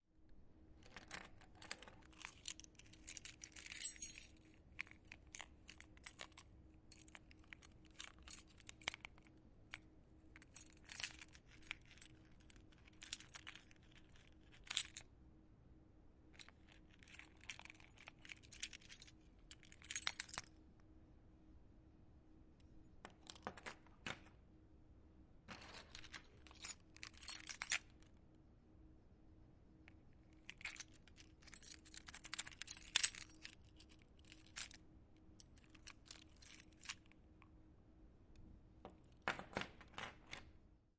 Recorded with a zoom H6. Picking up, moving my car keys and putting them down.
car, down, keys, OWI, picking, putting, up, walking